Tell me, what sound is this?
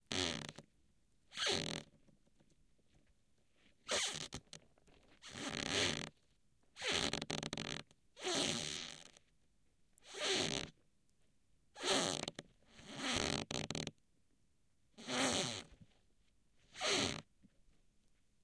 linoleum floor squeaks
squeaks of a cheap linoleum floor.
2x piezo-> PCM M10